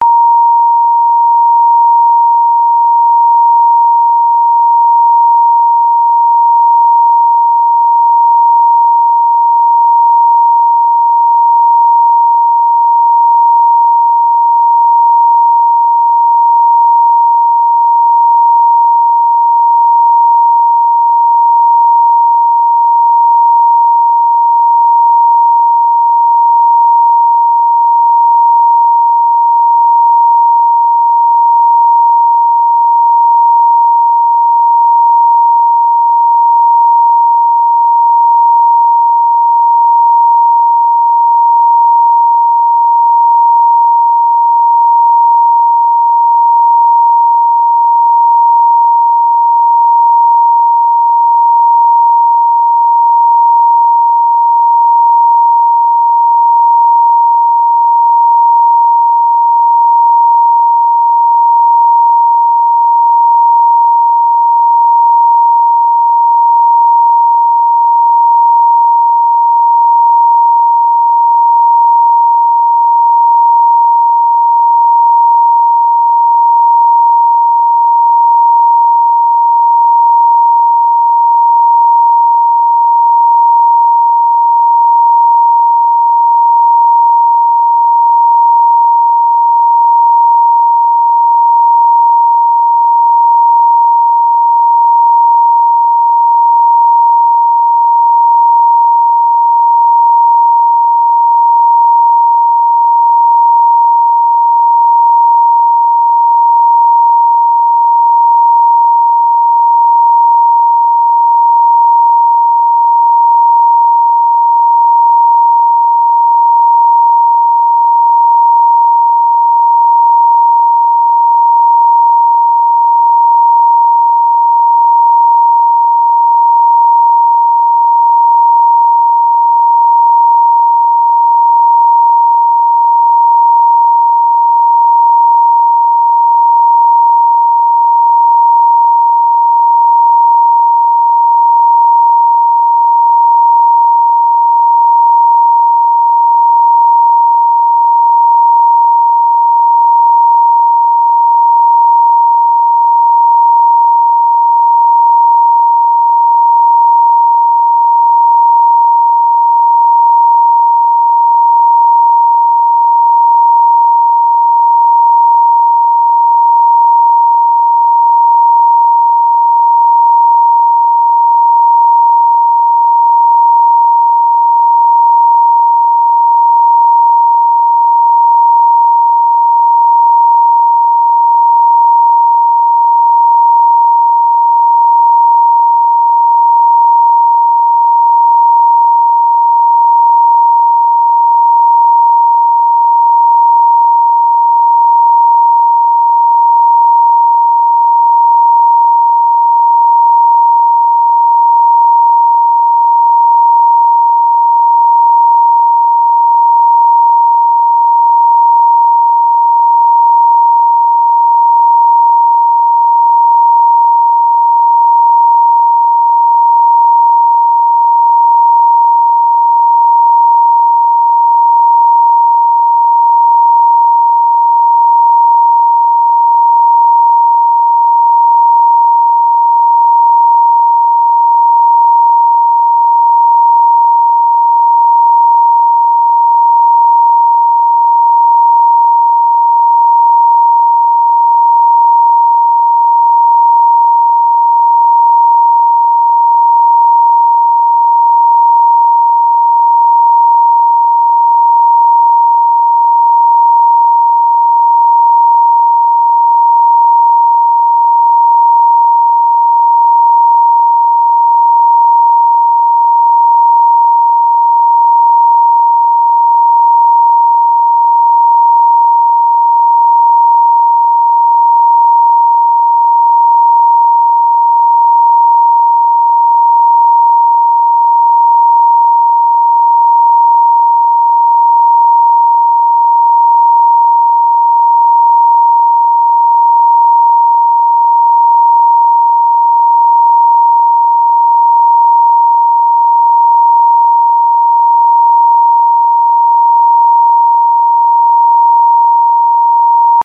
936Hz Solfeggio Frequency - Pure Sine Wave - 3D Spin
May be someone will find it useful as part of their creative work :)
om, tibet, chant, aum, solfeggio, tibetan, gregorian, tone, spin, 3d, yogic, hz